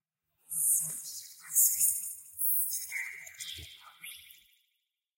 Variation for friendly ghost whispering.
Dubbed and edited by me.